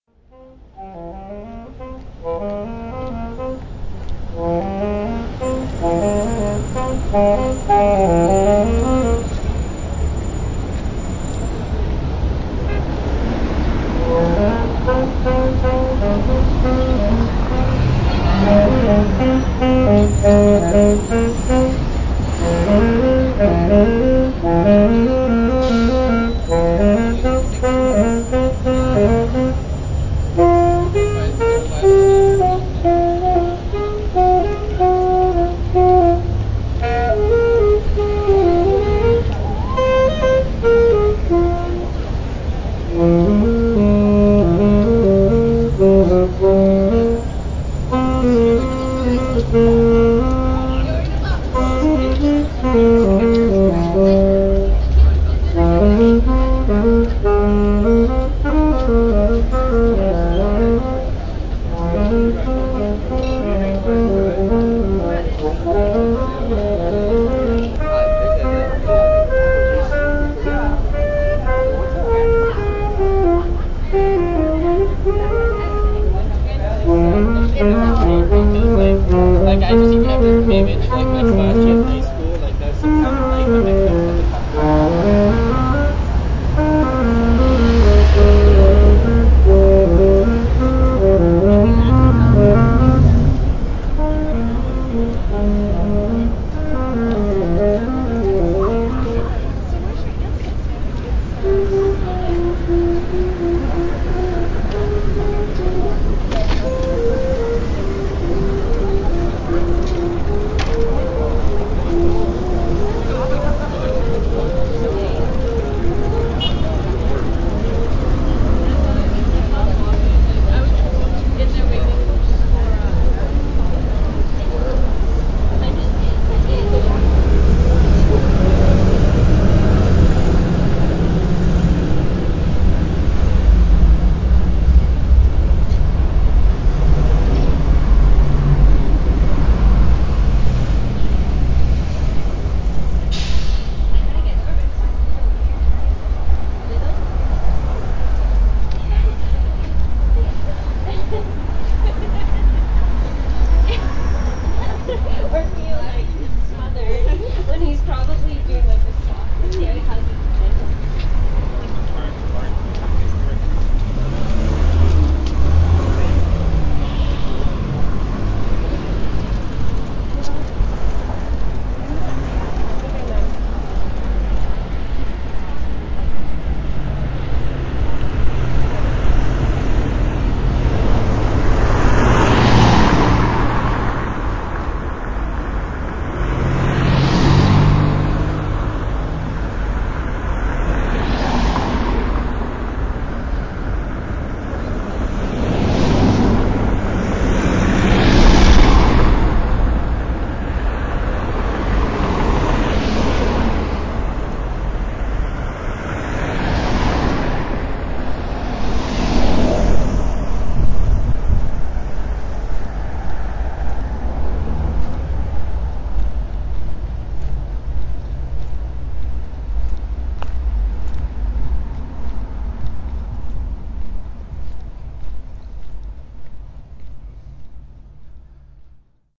Sounds of College street in little Italy, Toronto Ontario. 2006.
Walking on College street on my way to see old friends. Heard the erratic notes of a noodling horn player and started recording. There was a lot of traffic and it was after sunset in august. I walked behind two women walking , and then crossed the street in front of a street car after the traffic broke for a red lite.